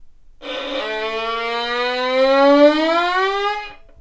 violin rise up 02
A short violin rise up. Recorded with zoom h4n.
bow, riser, Unprossessed, field, violin, string, clean, recording, distortion